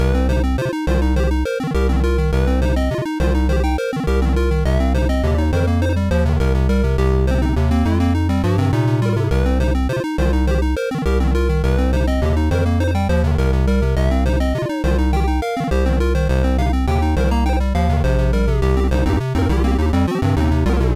Chiptune Loop Episode 04
Useful for 2d pixel games.
Thank you for the effort.
cool, original, melody, beepbox, old, tune, school, sample, 8bit, loop, sound, music, retro, effect, computer, Chiptune